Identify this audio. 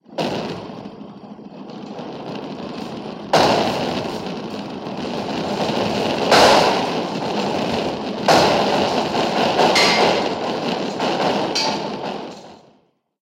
shaking metal sheet
metal sheet studio recording
metal-sheet, recording, percussion